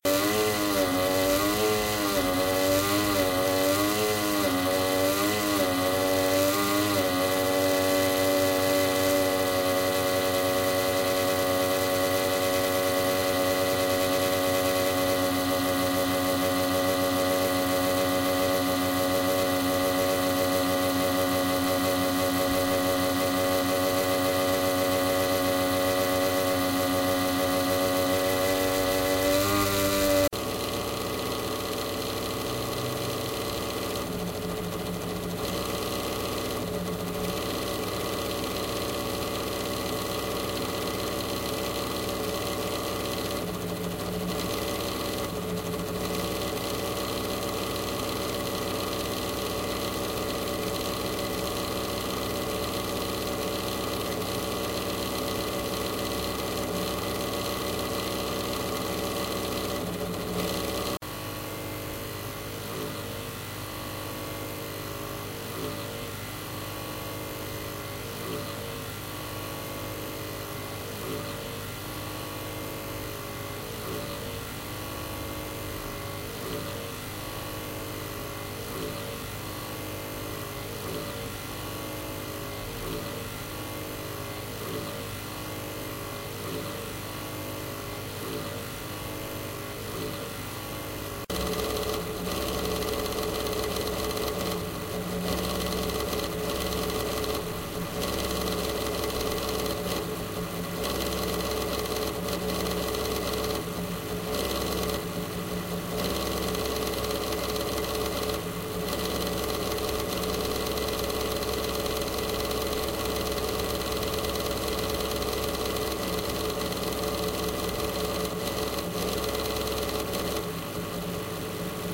broken pc cooler 4x
My broken cooler (not longer in use!)
broken, cooler, damage, pc